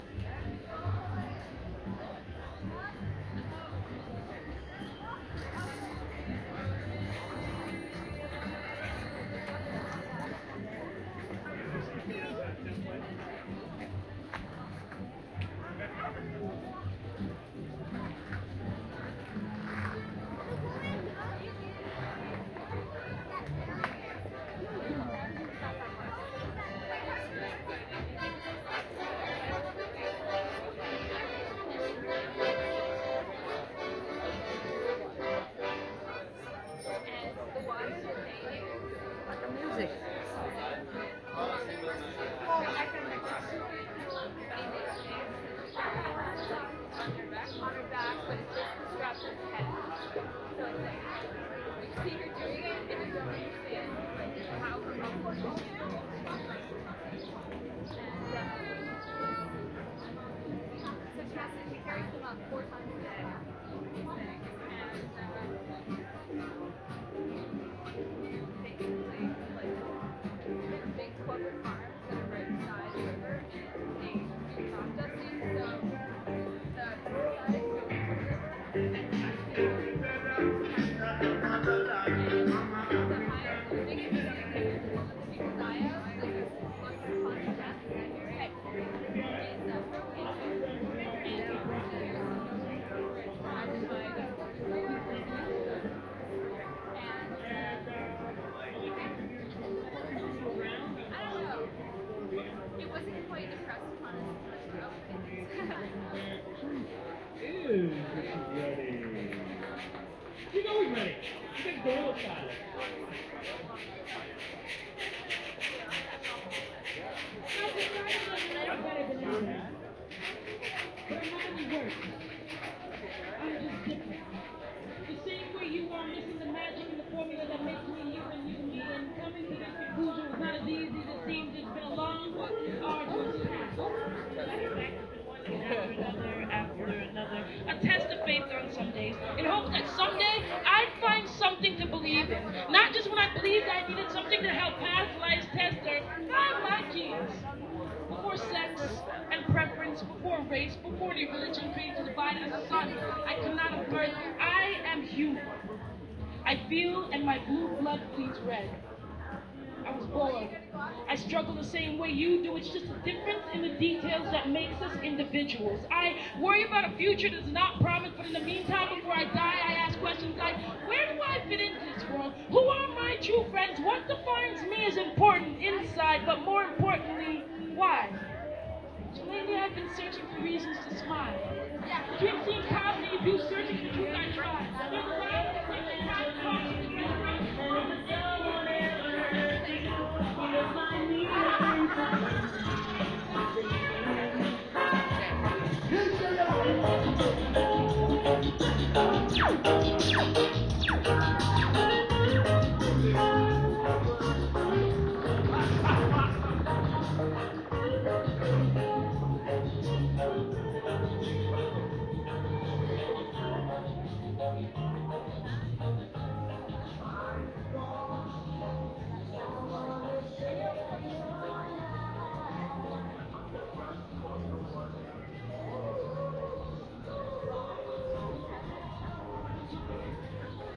kensington market 01
Walking around Toronto's Kensington Market area. Bits and pieces of street performers, music and spoken word.Recorded with Sound Professional in-ear binaural mics into Zoom H4.